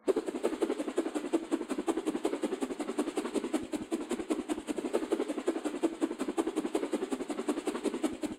I used a stick to make this sound, it's sound like many punch in the air, good for cartoons.
air, swash, animation, cartoon, whoosh, swing, woosh, stick, fighting, attack, whip, bamboo, fight, swoosh, punch